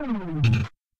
PowerDown Faster
Powering down a computer/machine, but faster. Can be pitched higher or lower. Made using Ableton's Operator and Analog synths.